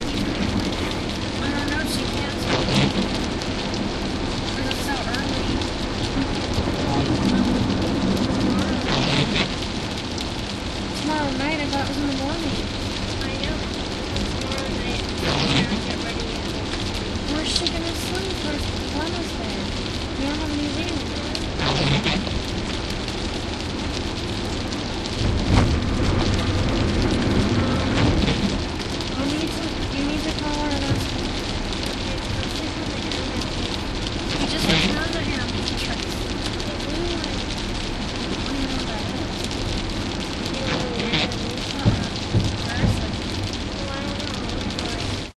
rain on the highway
Memorial Day weekend rain and thunderstorm recordings made with DS-40 and edited in Wavosaur. Driving back from PBI in the rain that interupted my PBI sample pack. It's all connected man... it's freaking me out.
ambience, car, interior, rain, storm, thunder